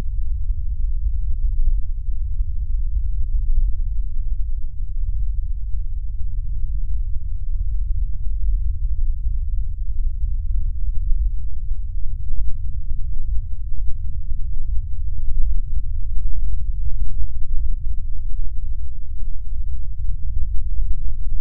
Low Ambient Rumble: wind, generator, etc
A low ambient noise. Suggested: Wind, Generator, Mood
ambient, fx, game, generator, sound, sound-effect, video-game, wind